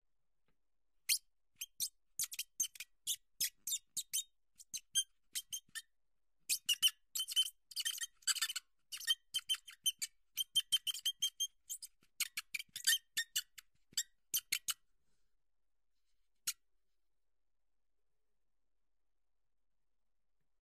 flamingo glass speedmarker
Simulated speedmarker on whiteboard sound: Flamingo rubbed on a wet glass surface. Recorded with Zoom H4n
Speedmarker, marker, writing, draw, drawing, scribble, write